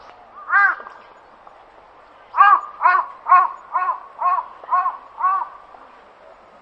Japanese raven in a park. Saitama (japan). Nov 2013 Marantz PMD 661 MK II portable recorder.
Corbeau, Japan, Raven